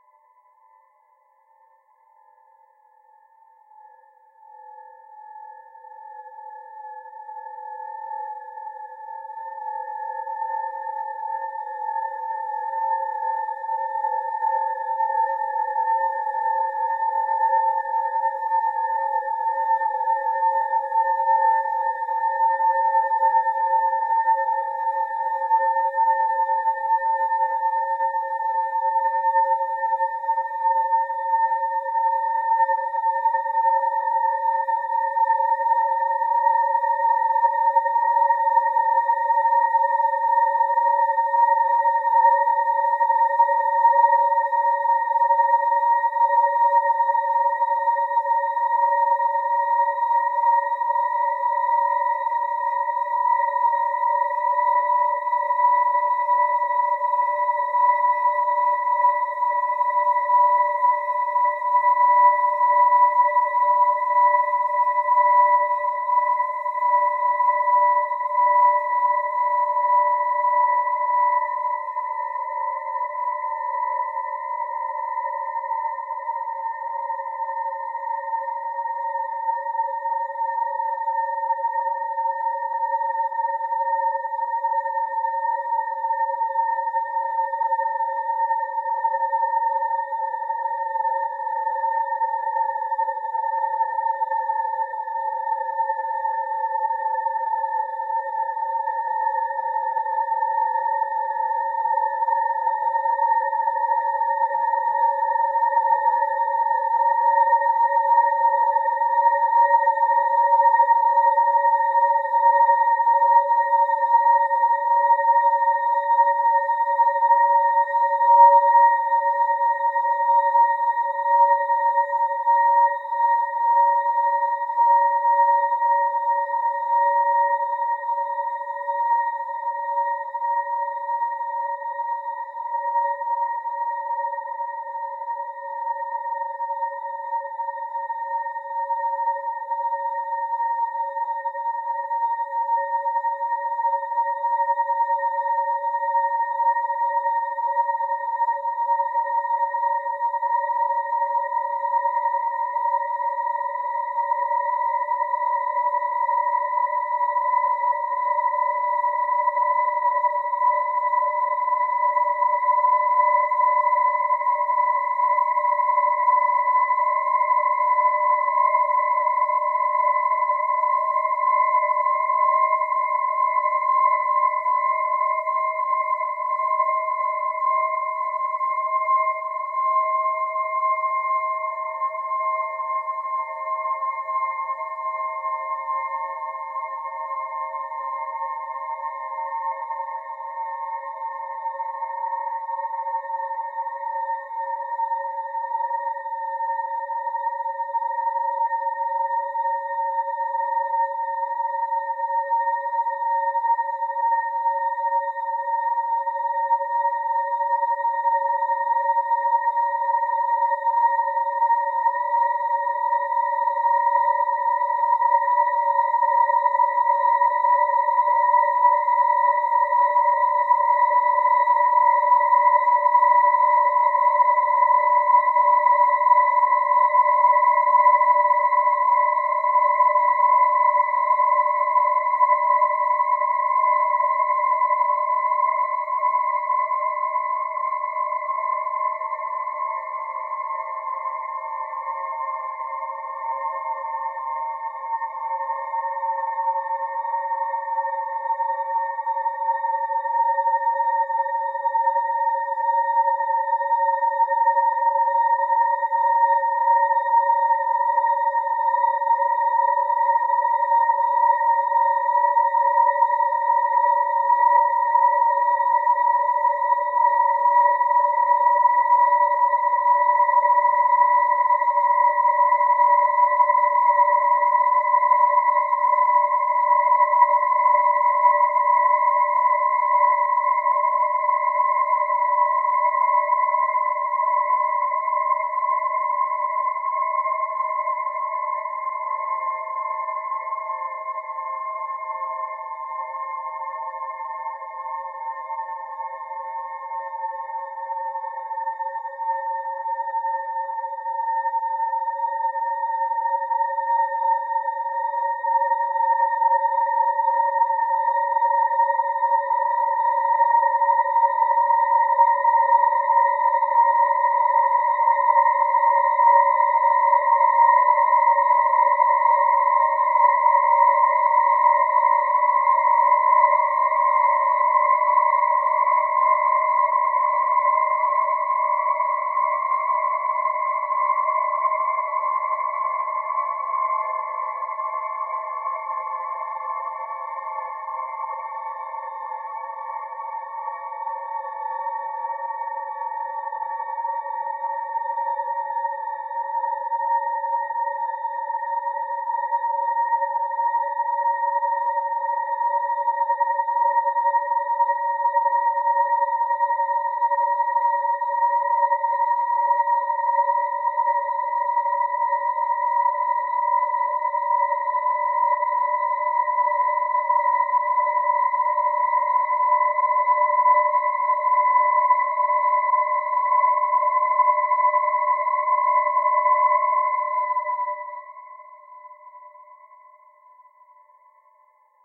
High-pitched, soaring vocal sound with slowly undulating pitch and volume.